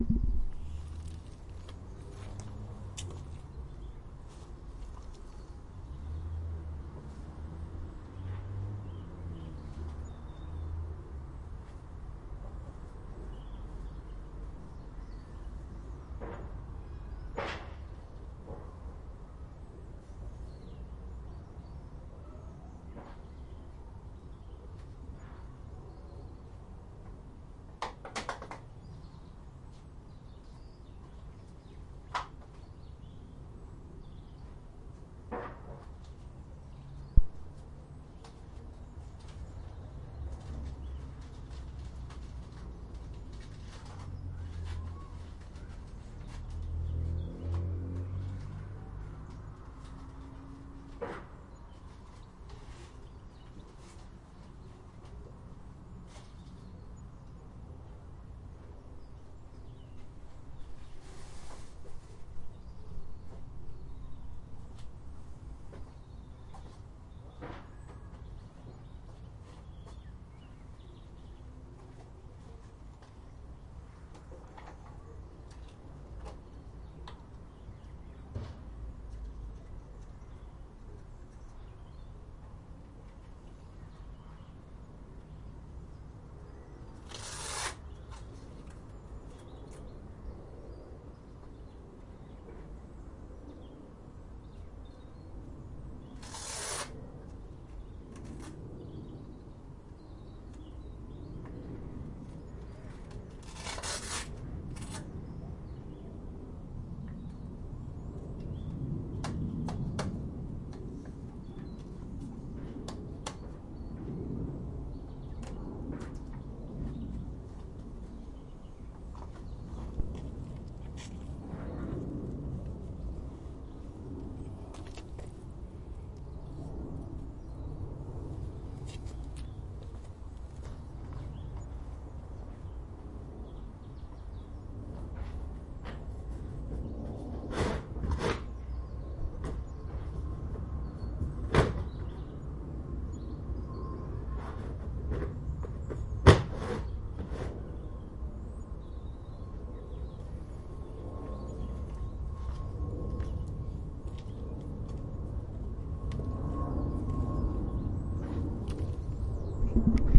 Back Neighbour construction 04-Oct-2015 006
In the first half of this recording you can hear some sounds made by the builders throwing things in the neighbour's property. Also quite a lot of distant street traffic.
Some closer sounds in the recording were made by me, as I was doing some work in the garden at the time. At 1min 25s, 1min34s and 1min42s I am using sticky tape.
2min15s I am moving some heavy items.
Recorded with Zoom H1.